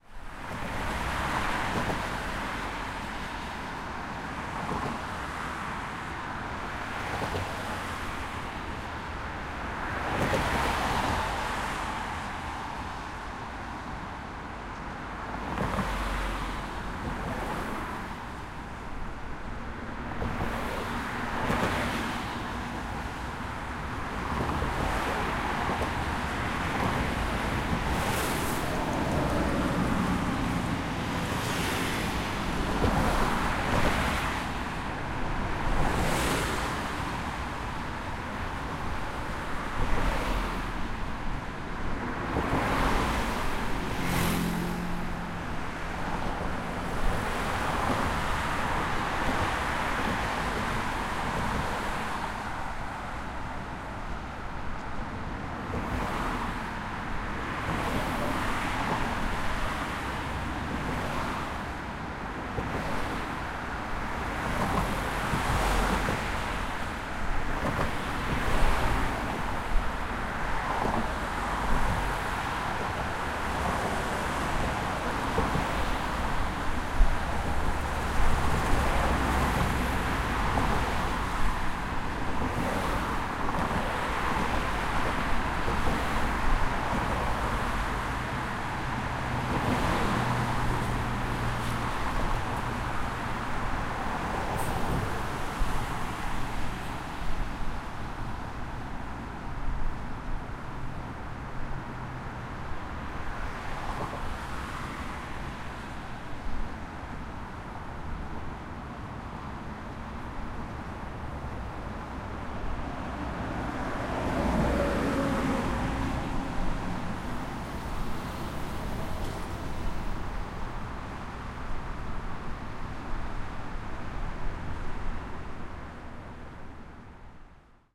0300 Mapo bridge
Traffic in Mapo bridge.
20120616
field-recording, korea, seoul, traffic